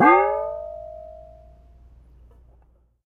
Pringle can recorded from inside and out for use as percussion and some sounds usable as impulse responses to give you that inside the pringle can sound that all the kids are doing these days.